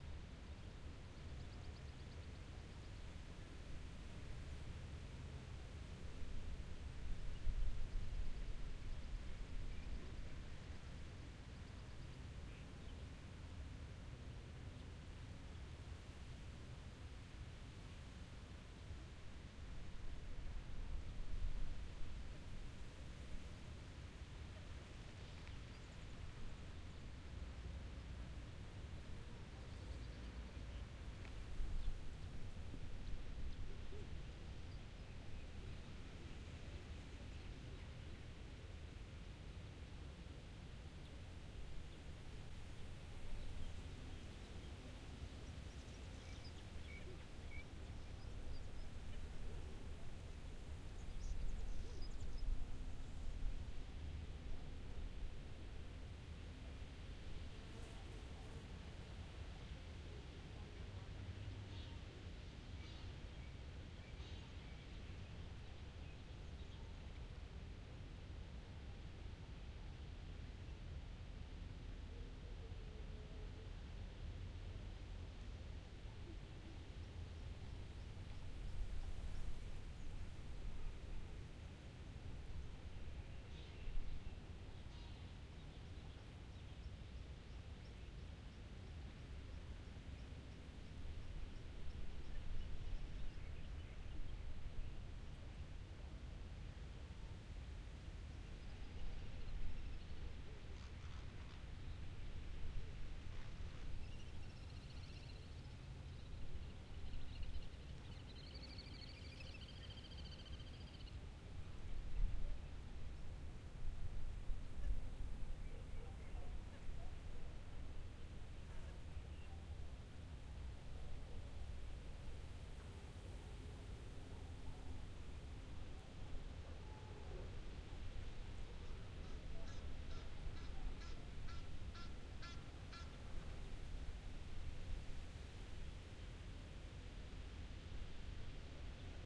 Dam ambience
Ambience taken at a dam with birds and water sounds.
Birds, Dam, Day, Water, Park, Nature, Ambience, Peaceful, Field-recording, OWI